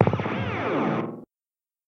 Alien Weapon 018 echo
Sounds like an alien weapon, laser beam, etc.
Processed from some old experiments of mine involving the guiar amp modelling software Revalver III. These add some echo added for extra cheezy sci-fi effect.
Maybe they could be useful as game FX.
See pack description for more details.
laser, weapon, game, alien, FX, arifact, beam, experimental, amp-VST, virtual-amp, amplifier, sci-fi, Revalver-III, amp-modelling